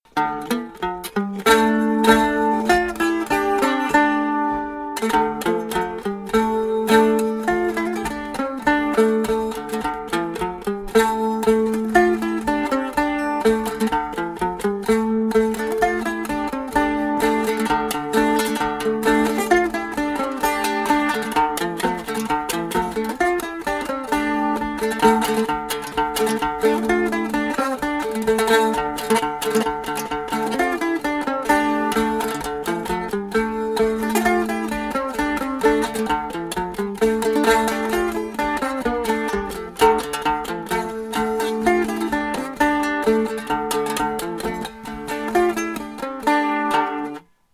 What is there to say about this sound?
balalaika-esque
me playing a small antique 14-string guitar tuned dadgad to make it sound like an ethnic instrument.